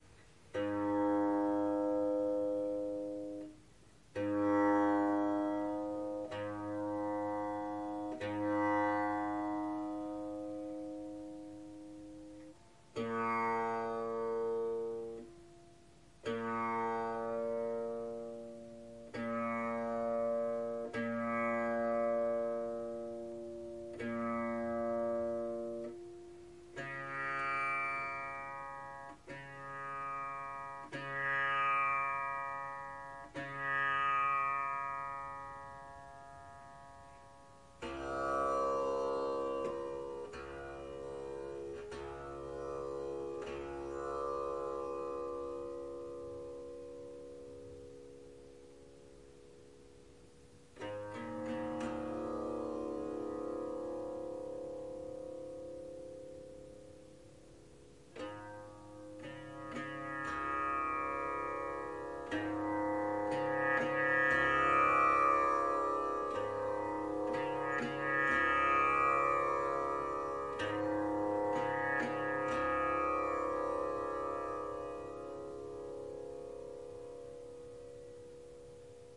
Tanpura Tuning to C sharp 02
Tuning the tanpura, it's already in C sharp but needs a few tweaks as it has lost it's tuning a little bit.
The notes from top to bottom are G sharp (Pa) A sharp (Dha) C sharp (Sa) and Low C sharp (Sa)
Once tuned you can hear an example of the Pa Sa Sa Sa rhythm.